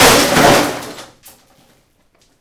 crash klir 2
One of a pack of sounds, recorded in an abandoned industrial complex.
Recorded with a Zoom H2.